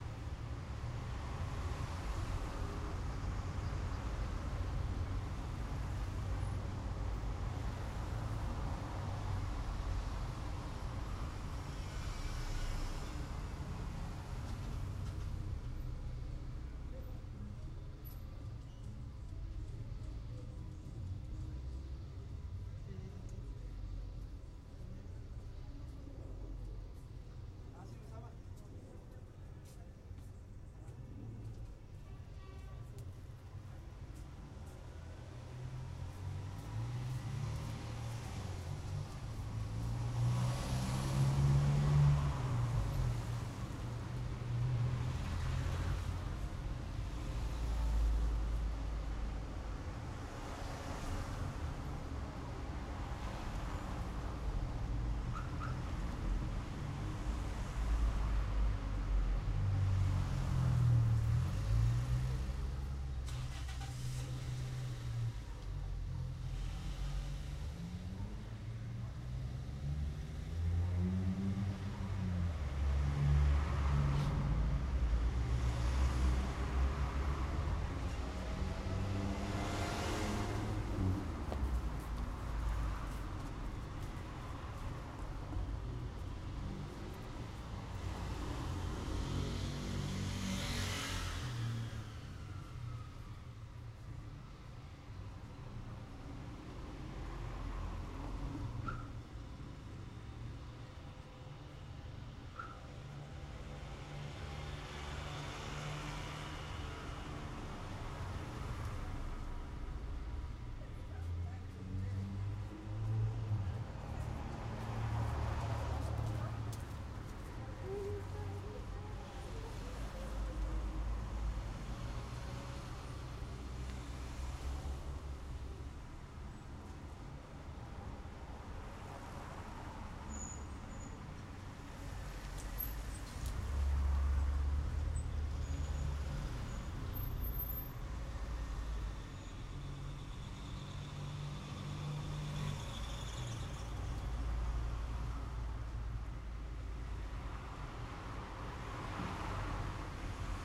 Calle pequeña
sounds of cars in a small street day. Sonidos de autos en una pequeña calle de día